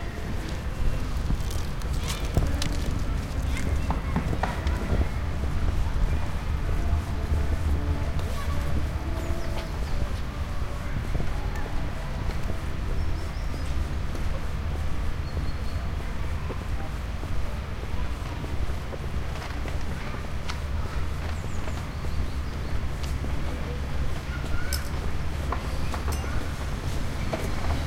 Karlsplatz 2b Vögel
Recording from "Karlsplatz" in vienna.